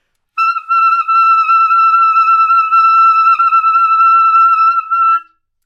Part of the Good-sounds dataset of monophonic instrumental sounds.
instrument::clarinet
note::E
octave::6
midi note::76
good-sounds-id::924
Intentionally played as an example of bad-pitch-vibrato